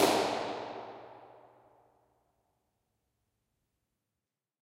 IR clap small Hexagonic Chapel lo-pitch
Clap in a small Hexagonic chapel near Castle Eerde in the Netherlands. Very useful as convolution reverb sample.